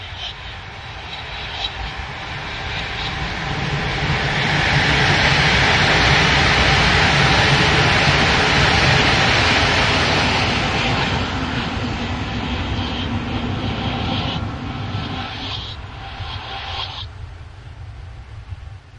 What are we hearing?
U3 near ruin Bouce ball echo reversed
The same local Train going over some Points at a moderate speed. Edited using "Audacity", with echo effect then reversed.
Points, Train, Tube